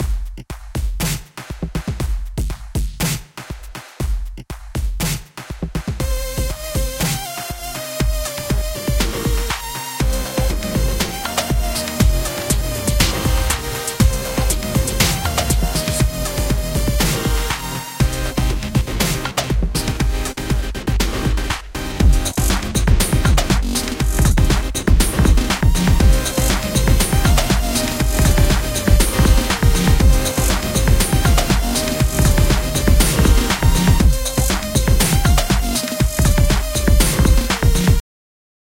Raunchy Drum/Bass attack
Quickly compiled this loop with GarageBand in spare time. Sort of thought of it as a game loop, like the intro.